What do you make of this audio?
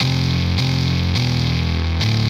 105 Necropolis Synth 02
heavy gut synth